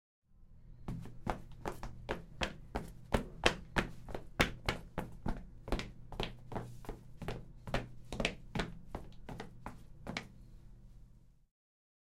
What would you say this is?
38-pasos apresurados
A fast walk of a man